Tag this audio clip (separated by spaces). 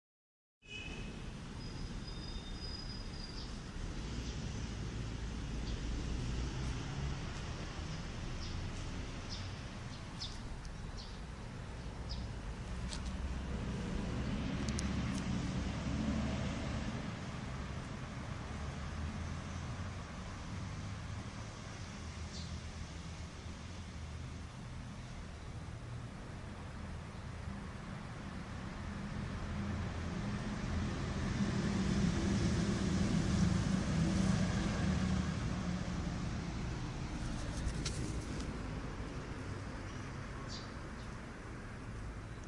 bird singing sica sound